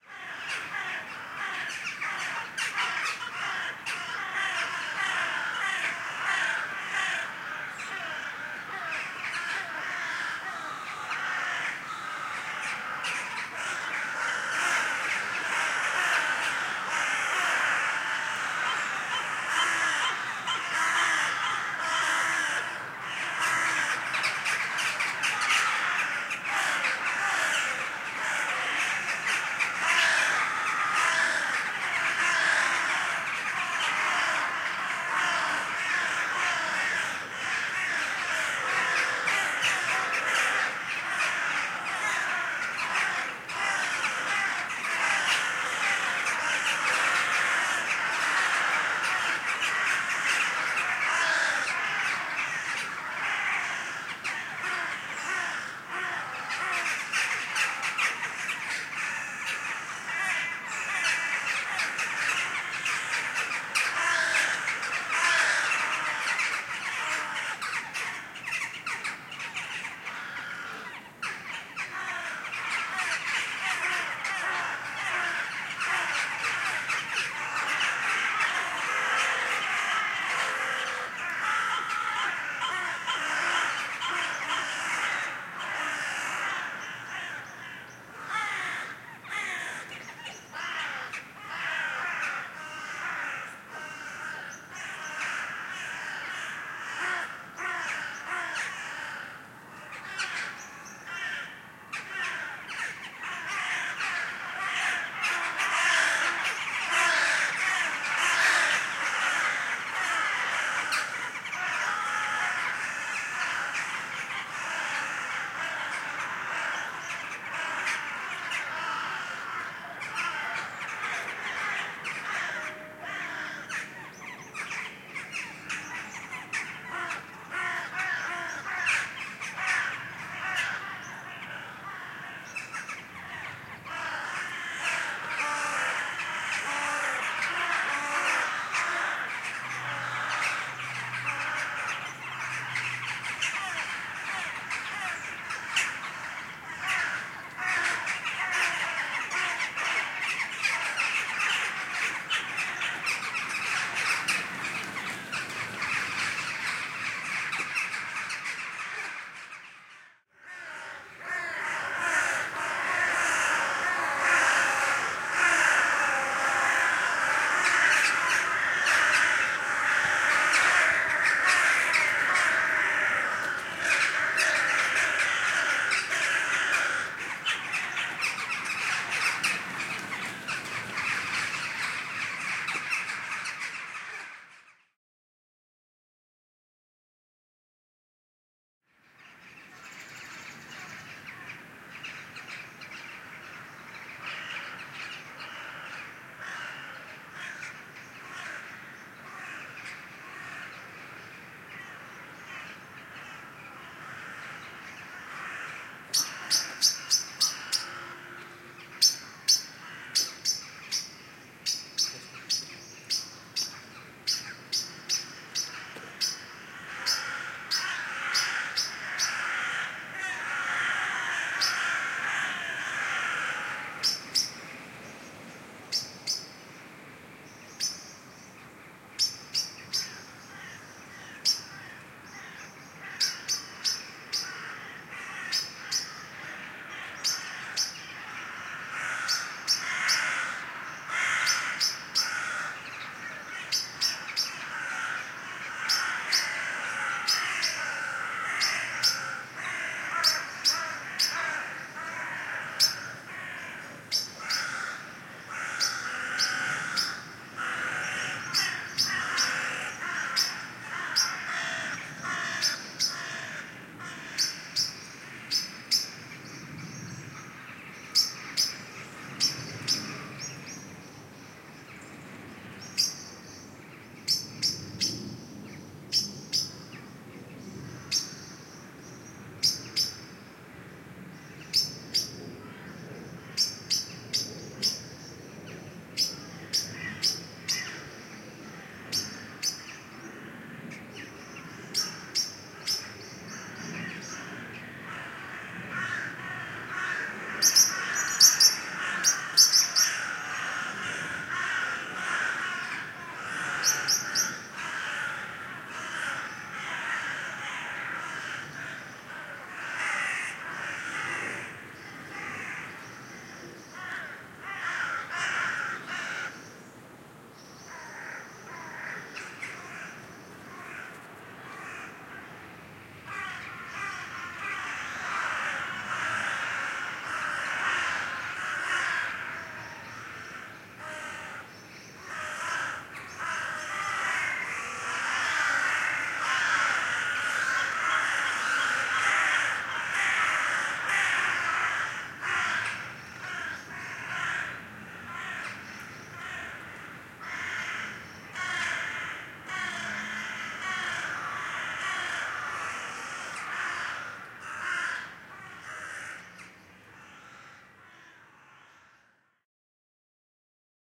Crows from Siberia winter in our city.
Sounds like the movie "The Birds" by Hitchcock